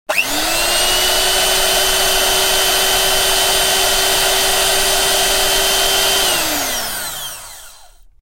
BEAT10MT
A sample of my Sunbeam Beatermix Pro 320 Watt electric beater at high speed setting #5. Recorded on 2 tracks in "The Closet" using a Rode NT1A and a Rode NT3 mic, mixed to stereo and processed through a multi band limiter.
electric, beater, appliance, kitchen